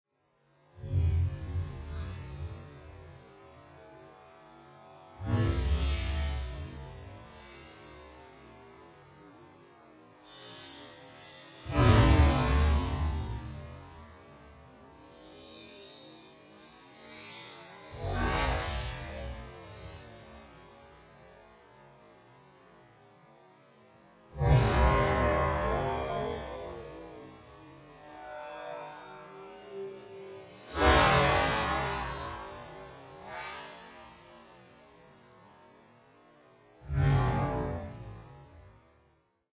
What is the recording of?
Extremely slowed down stomping with a lot of digital sounding howling while the volume increases in waves. Resembles warped chimes. Recorded on mac Apple built in computer microphone. Sound was further manipulated in Reaper sound editor.